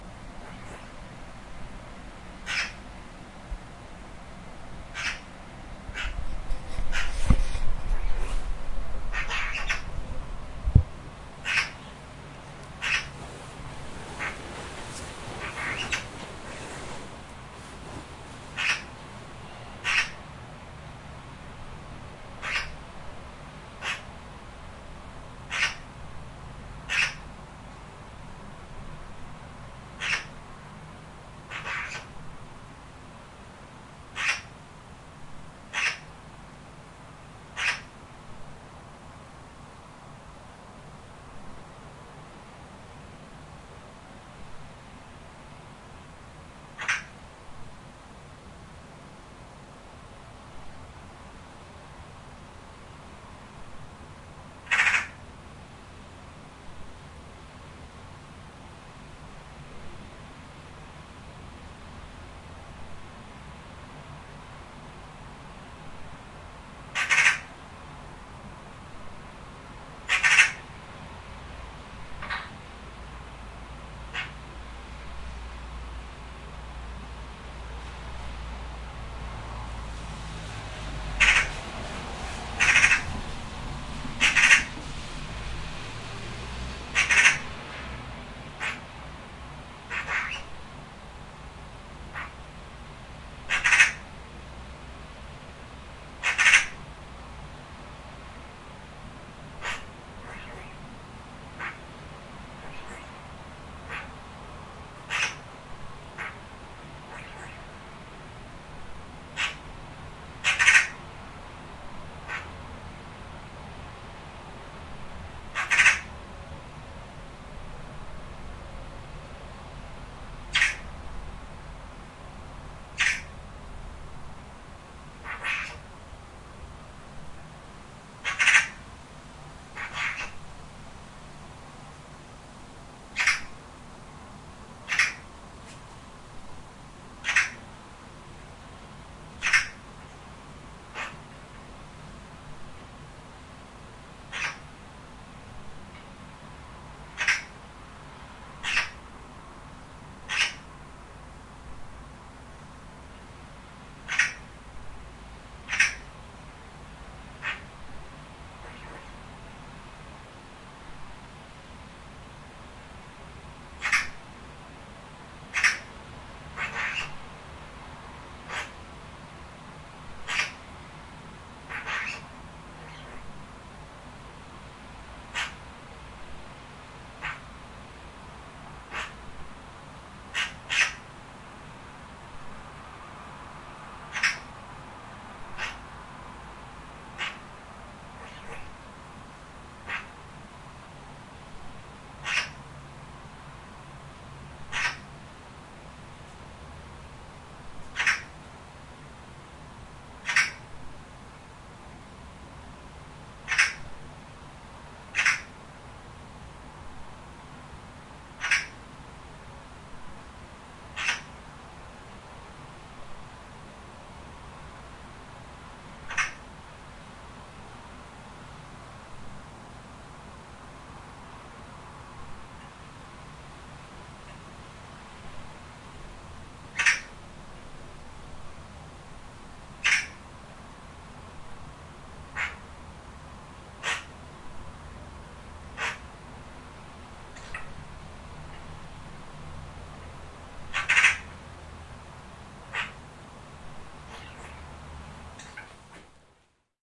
One morning I got woken up by a magpie sitting on an open window. I brought out the recorder, pressed record and went back to bed.
Here's the result.